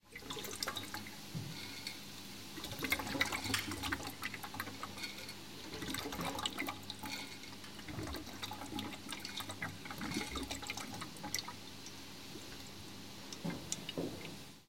boiling-water
Sound of boiling water in flask in laboratory. Ambience of hiss of steam. Recorded on Zoom H4n using SP B1 Microphone. Bit compression as post-process.